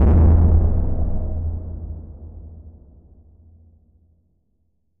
A dark impact sound.
Based on a metallic hit, but distorted. Suitable for e.g. horror films or games.
Post-Production: EQ, Distortion, Reverb
impact, cinematic, horror, hit, dark